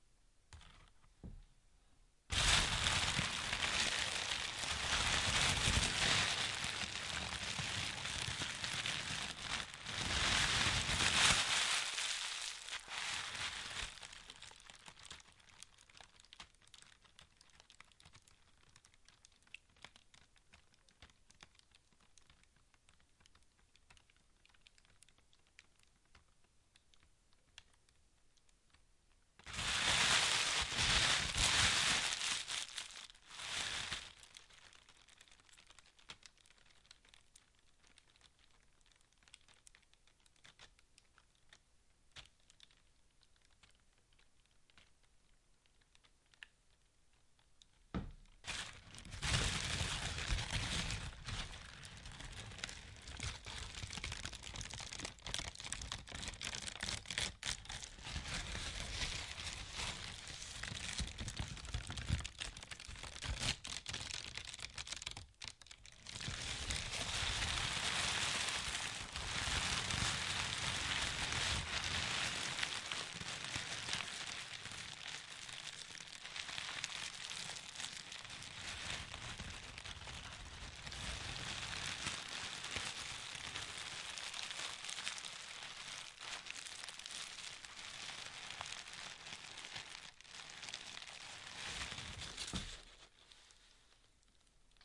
Fire One
Fire sounds including sounds of roaring flame and crackling. Recorded on a Rode mic and Zoom H4N Pro.
burning, crackling, fire, flame, flames